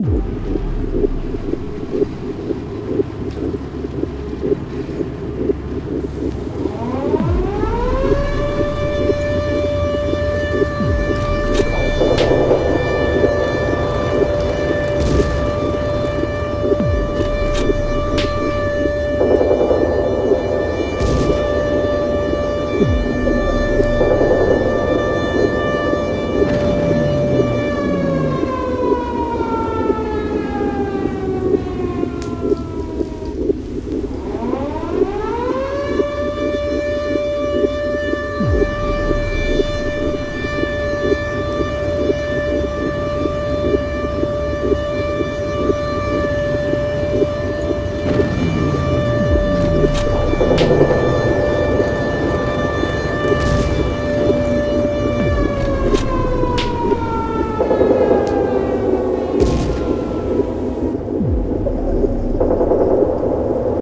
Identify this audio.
german match to poland 1939 war time v2
troops, war-time, air-ride, bombs, world-war-2, tanks, voice, 1939, plains